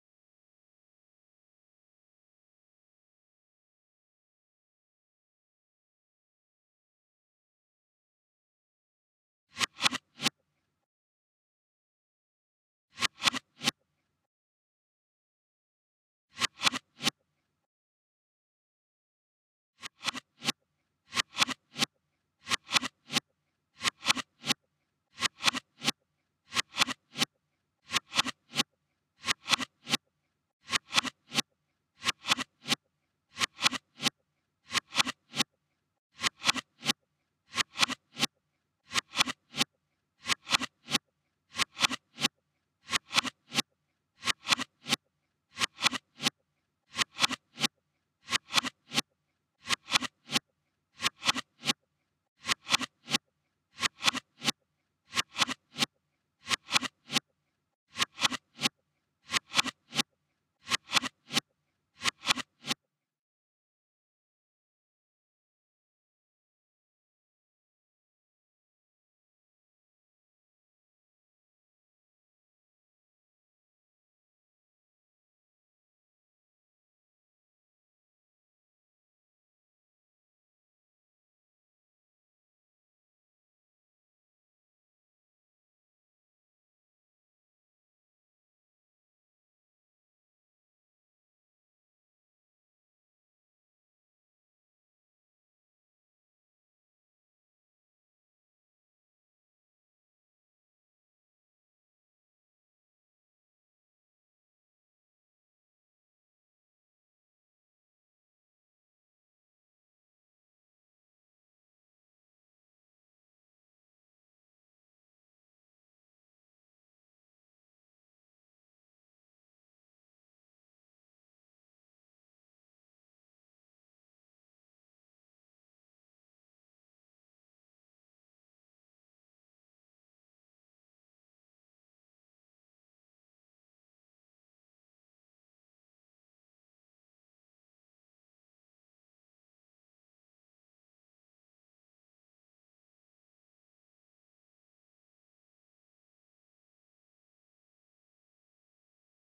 Reverse Smacking
Smacking a desk manipulated in reverse. Recorded with a MacBook Pro.
Smacking, Reverse, MacBook